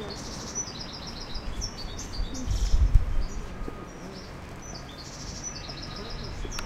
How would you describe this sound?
Mentres arribaven al parc de la Solidarita van trobar en un balcó un ocell canari, van decidir grabar-lo
bird, city, deltasona, el-prat, field-recording, ocell-canari, wild-canary